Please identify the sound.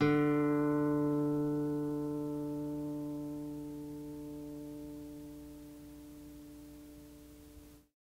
Tape Ac Guitar 3
Lo-fi tape samples at your disposal.